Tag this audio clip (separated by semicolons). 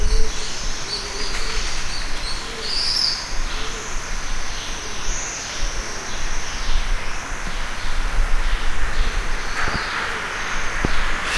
birds; countryside